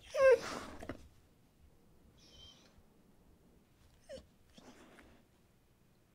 Dog Whimper 2
Medium-sized dog whimpering.
pet, sad, whimpering